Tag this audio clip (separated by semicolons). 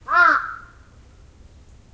bird; birds; crow; crows; field-recording; forest